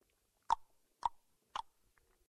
Clicking noise made with the mouth, close mic'd